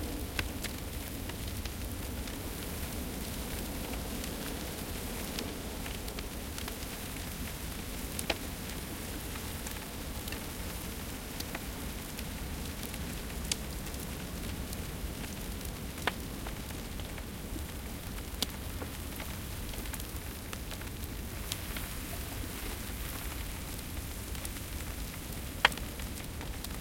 car burning
During a film shooting, we burned a car. Here´s 26 seconds of it.